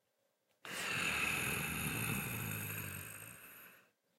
Male angry growl with closed mouth
angry, annoyed, growl, Grumble, human, man